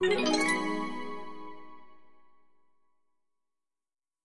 explosion beep kick game gamesound click levelUp adventure bleep sfx application startup clicks
adventure, application, beep, bleep, click, clicks, explosion, game, gamesound, kick, levelUp, sfx, startup